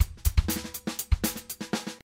funk acoustic drum loops